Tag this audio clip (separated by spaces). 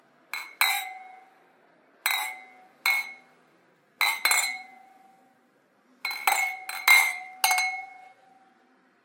cheers clang cling cristaline glass glasses